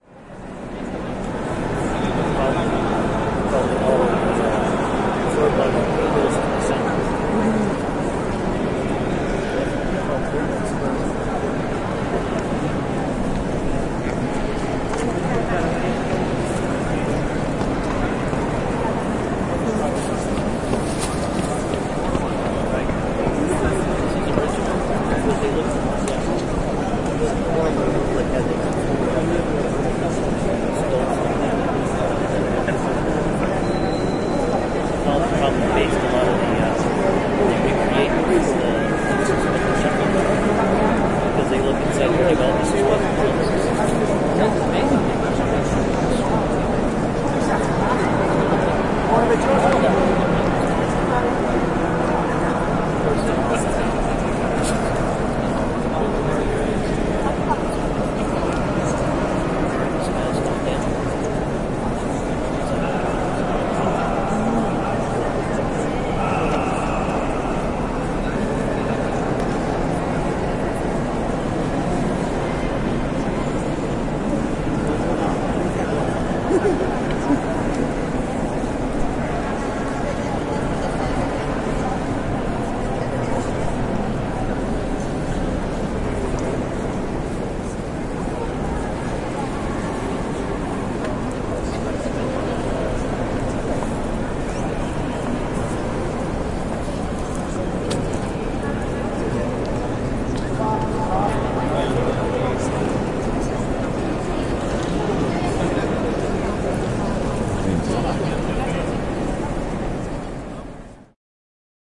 Pantheon ROME
Sound of the Pantheon in Rome during a busy touristic afternoon.